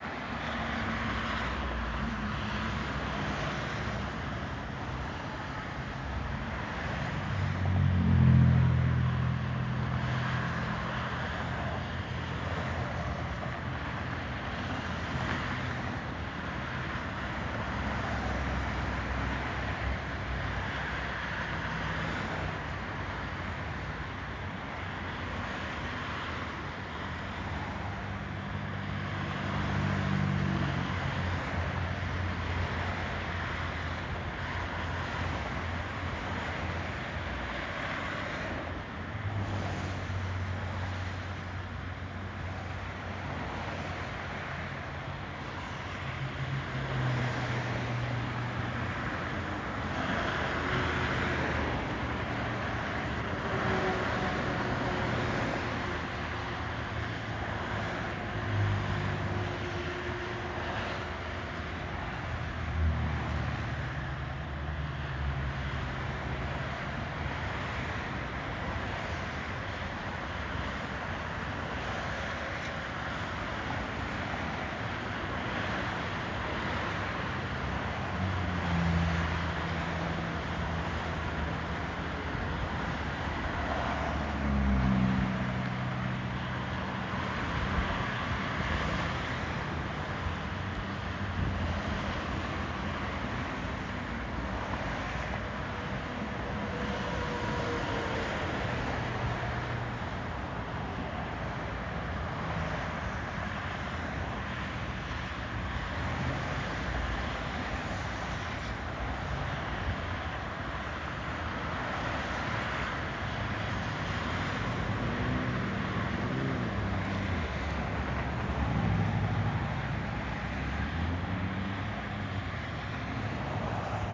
Recorded from bridge above interstate.
ambience, car, cars, city, driving, field-recording, highway, noise, road, street, traffic, urban